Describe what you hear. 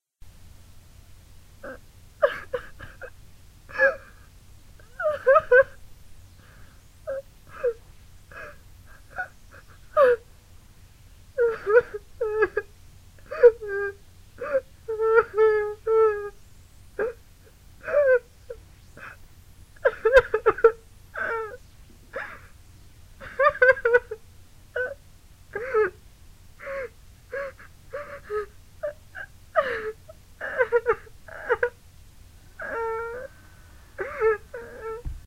simple recording of me crying. I had actual tears for this.
cry, crying, girl, lady, moan, moaning, sad, sadness, tears, woman